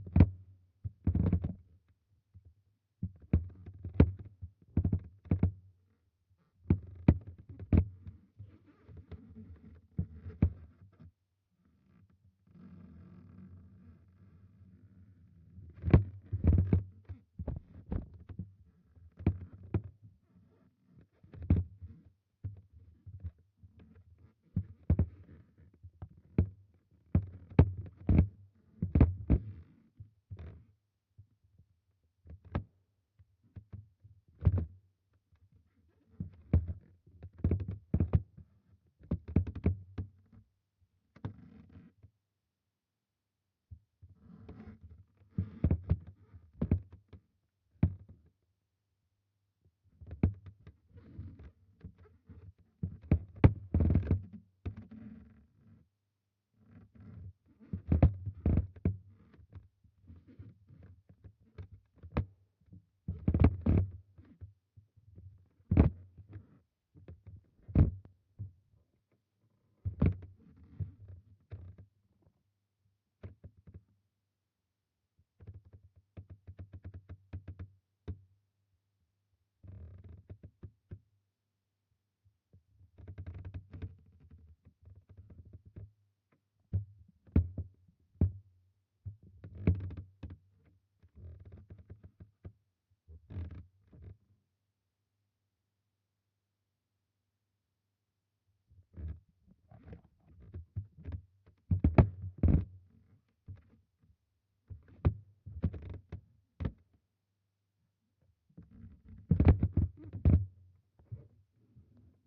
Contact Stair Creak 2
Creaks and groans. Muffled with a bit of crunch from microphones
Microphone: 2 x cDucer contact mic's in stereo